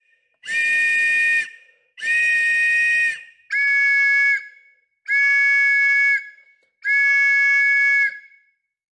foley, silbato de oficial en la primera guerra mundial.
whistle, ww1